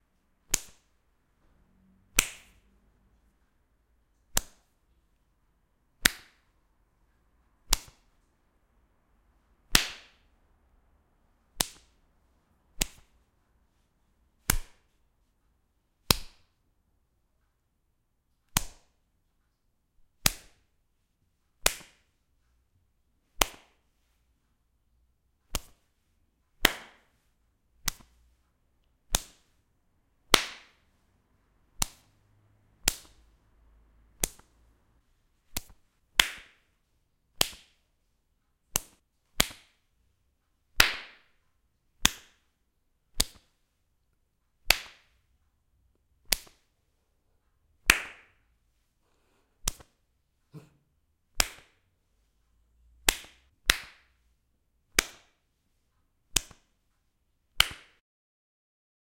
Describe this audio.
Slapping Face OWI
slapping someone or clap
indoors, or, outdoors, skin, slap